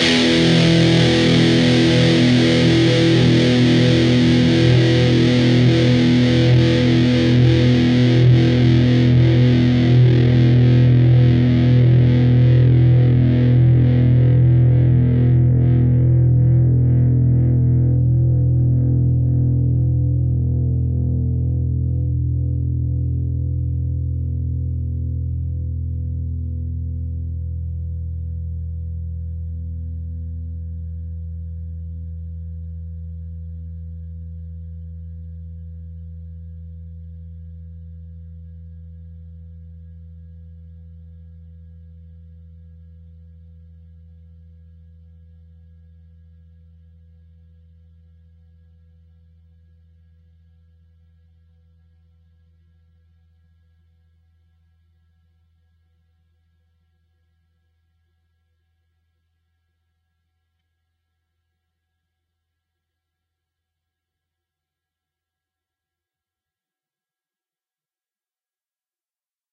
Standard E 5th chord. E (6th) string open, A (5th) string 2nd fret, D (4th) string, 2nd fret. Down strum.